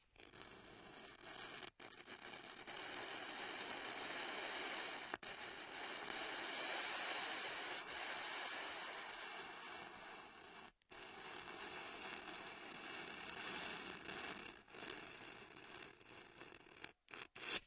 Queneau grat 16
regle qui gratte sur surface
gle, Grattements, r